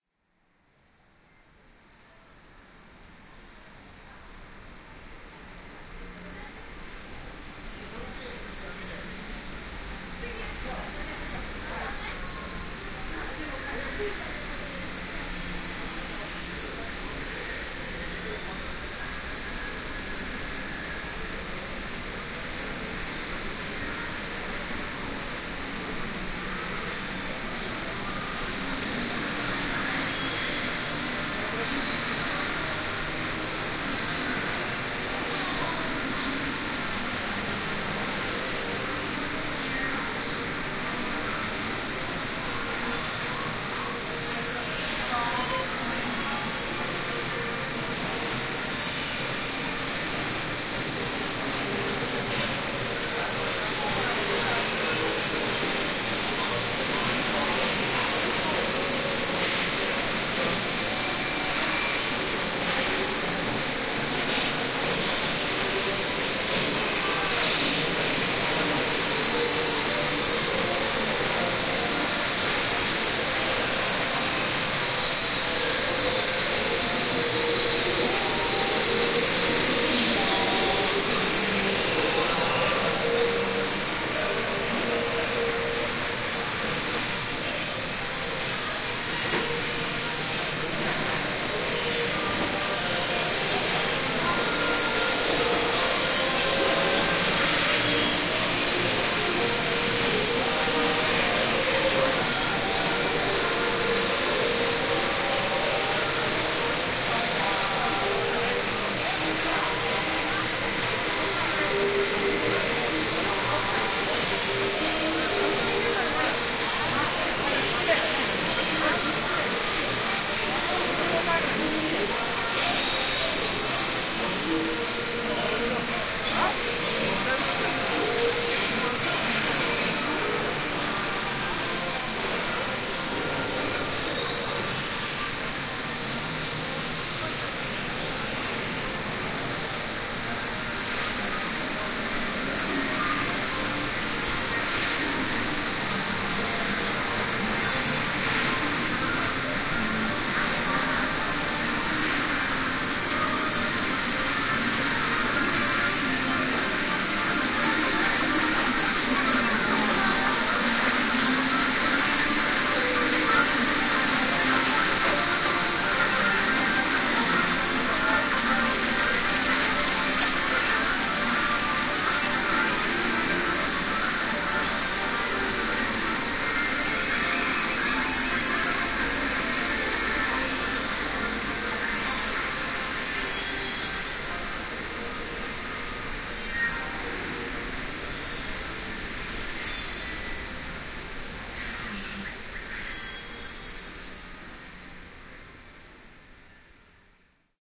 Intense sound of many Panchinko machines chugging and buzzing away within one floor of the Pachinko Tower, Shinbuya, Tokyo.